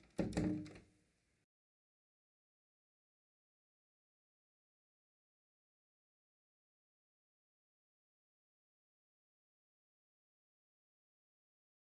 Sonido de puerta cerrándose